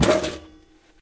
Moving metal objects
chaotic, clatter, crash, objects